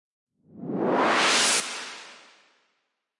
Uplift 1 Bar 150 BPM F Sharp
This sound was created using Serum and third party effects and processors.